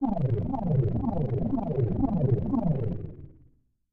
Synth loop fade debuff nerf magic pitch down
debuff, down, fade, loop, magic, nerf, pitch, Synth